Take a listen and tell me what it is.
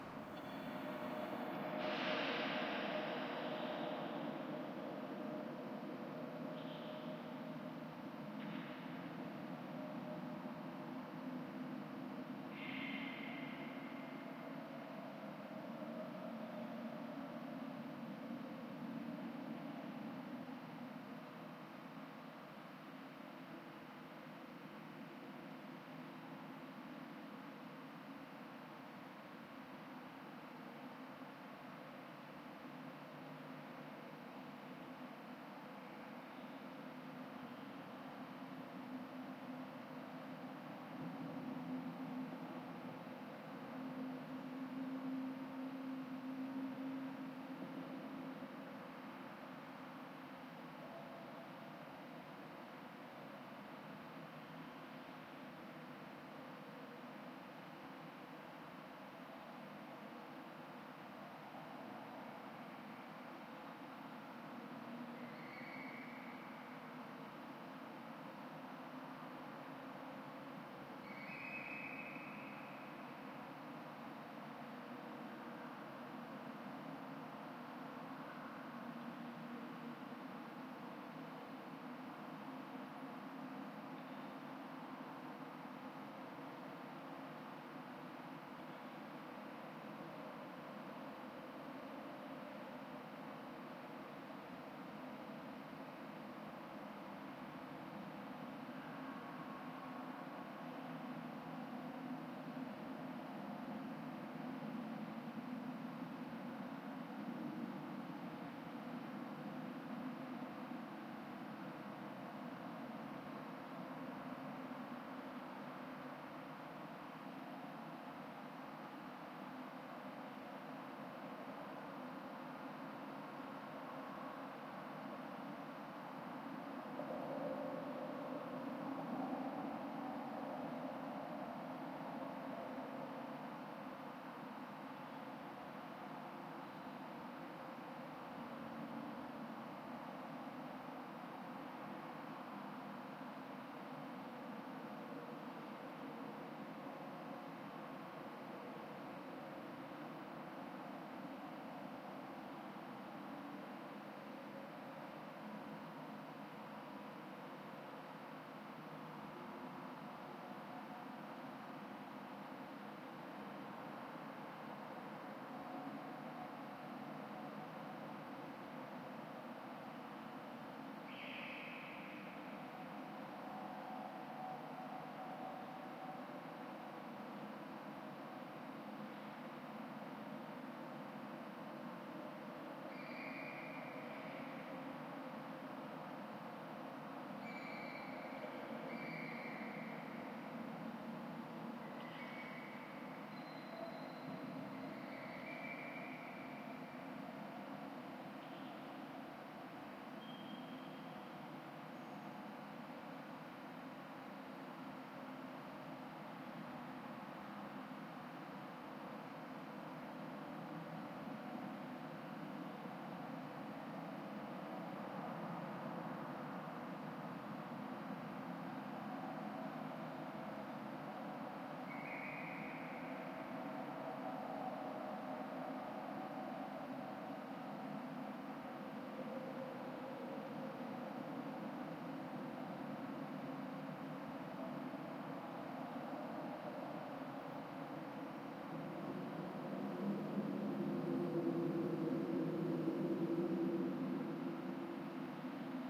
Atmo Berlin - Hinterhof in Kreuzberg #02 (surround test)
..it's August. We're staying at a friend's house. While Jazz and the baby were already sleeping I heard something like the singing of an exotic bird coming from outside. At last I taped it. [..this recording is a proof of me pushing and punching the original mono through almost any free VST Plugin I could find in order to see how much *whatever* I could get out of it; the context is true, however :]
air, Atmo, atmosphere, Berlin, bird, city, field, field-recording, hood, Kreuzberg, neighborhood, recording, residential, urban